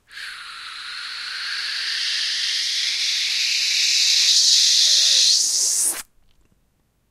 Woosh1 Up 4b 135bpm
Upward woosh
4 bars @ 135bpm
loop, beatbox, creative, dare-19